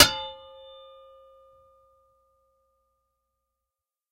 Shovel Thwack 1
Garden Shovel clanging as it is struck. I needed the sound of someone getting hit over the head with a shovel for a theatrical production [ Fuddy Meers ]. I recorded my garden shovel as I struck it with my shoe; quite effective.
clang, sfx, twang, shovel, hit, metal, whack, thwack, foley, garden